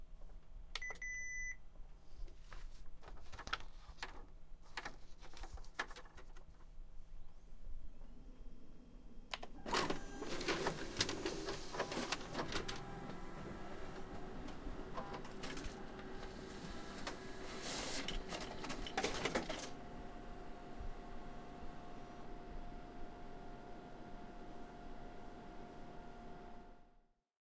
The office copier being used, but this time through the paper feed on top. You know, that compartment where you stick in several pages and then the machine eats them but spits them out because they taste like paper? Yeah that one.